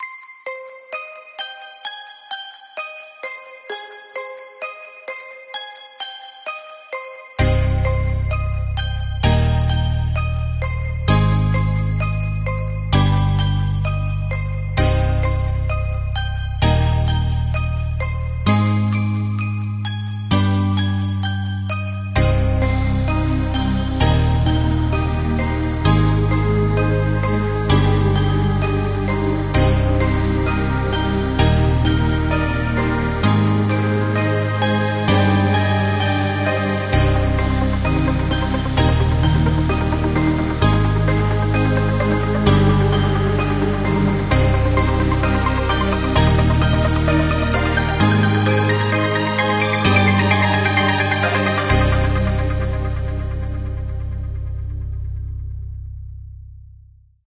Piano Intro & Buildup (Remix)
I made it sound more happy/beautiful.
Tell me what you think!
beautiful, build-up, buildup, custom, electronic, happy, intro, melodic, mystical, piano, plucked, remix, sound